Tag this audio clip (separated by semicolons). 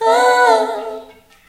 ooo; stephanie; vocal